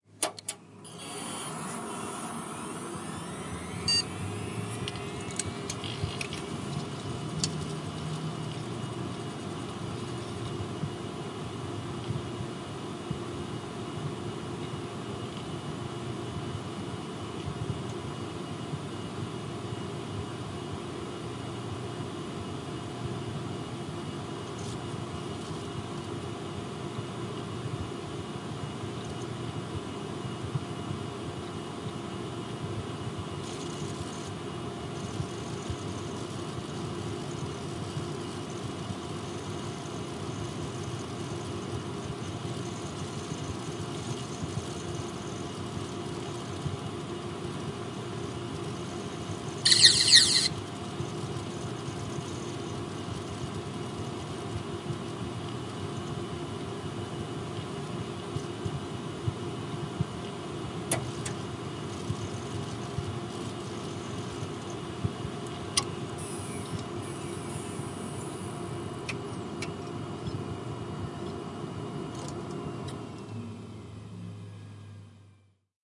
Inside a computer with 12 hard drives
Power switch is pressed, computer starts up, plays a sound effect through the internal speaker, power switch is pressed again and the computer shuts down.
Recorded with a Zoom H4n, placed inside the computer.
computer, electronics, hard-drive, mechanical, pc, turn-off, turn-on